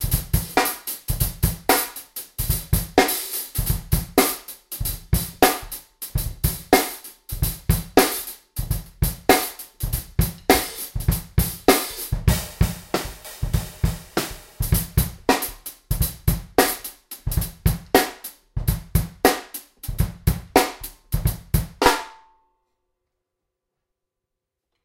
HipHop kit - weird beat 11 - foreva small+big snare
A weird tweeked drum beat inspired by the song Foreva by The Robert Glasper Experiment, played on my hip hop drum kit:
18" Tamburo kick
12x7" Mapex snare
14x6" Gretsch snare (fat)
14" old Zildjian New Beat hi hats w tambourine on top
18"+20" rides on top of each other for trashy effect
21" Zildjian K Custom Special Dry Ride
14" Sabian Encore Crash
18" Zildjian A Custom EFX Crash
hihat, hop, hip, snare, drums, kit